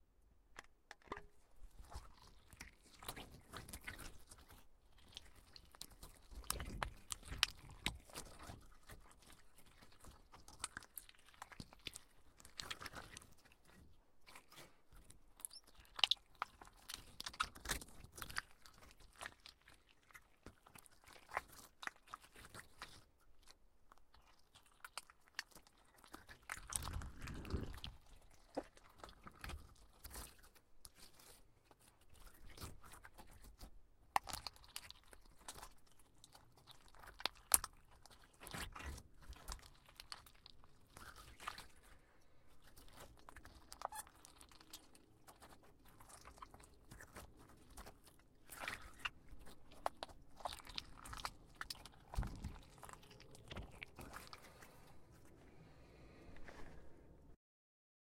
Slime Squish
The sound of fidgeting with wet a wet, slimed substance.